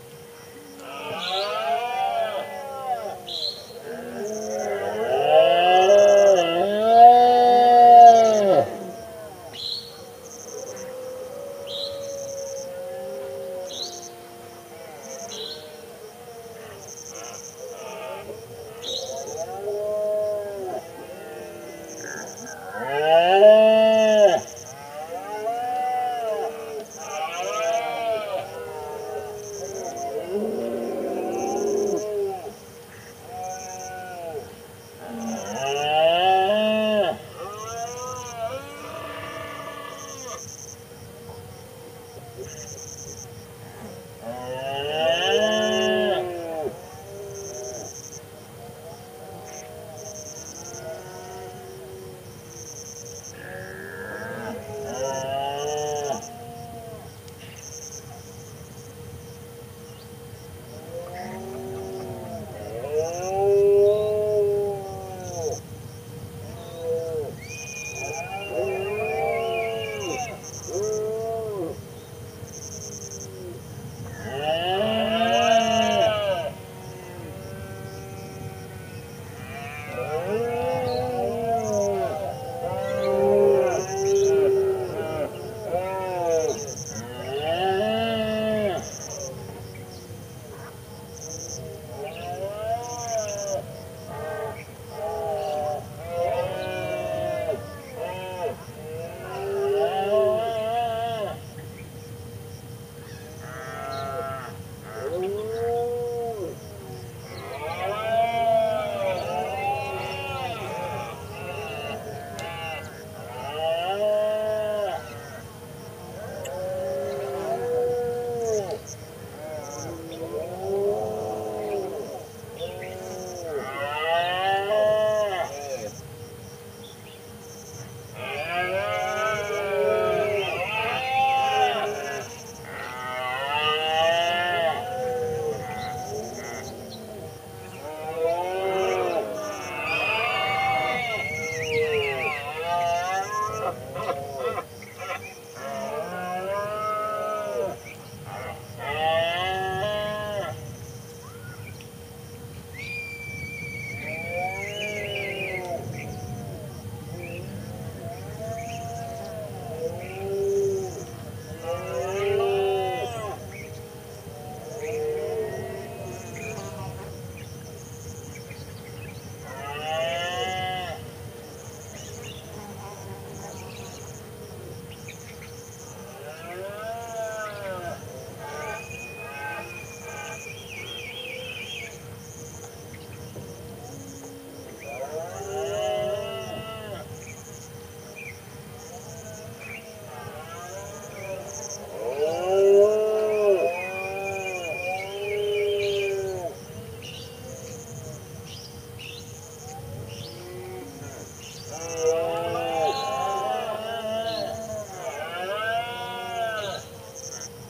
201009.dusk.ambiance.00
Mono ambiance take recorded at dusk in Doñana National Park, S Spain. Roaring of male Red-deer can be clearly heard, along with some insects and bird calls. Mic was a Sennheiser ME62 on a K6 system. The site is by a pond, so the noise of animals splashing and drinking can be heard every now and then.
donana, field-recording, insects, male, mediterranean, nature, red-deer, rutting, scrub, summer, voice